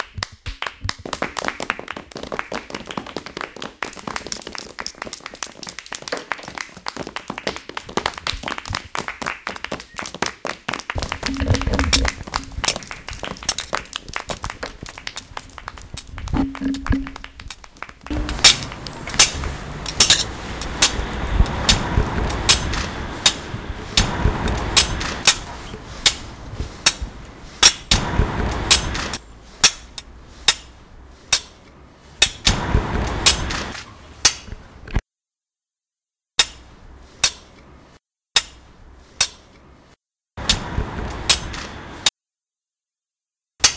sonicpostcards-SGFR-léandre,yaël
sonicpostcards produced by the students of Saint-Guinoux
france, saint-guinoux, sonicpostcards